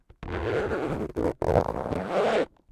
Zipper, recorded with a Zoom H1.
luggage, fastener, zipping, unzip, clothing